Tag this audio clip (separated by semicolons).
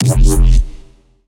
Bass; Dance; Drop; Dubstep; Electronic; Reese